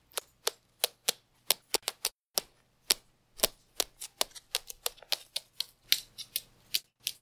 pigeon footsteps on parquet floor
Footsteps of a pigeon walking on a parquet floor.
Recording device: Pixel 4 XL
footsteps, walking, step, steps, hardwood, bird, walk, parquet, noise, floor, wood, feet, pigeon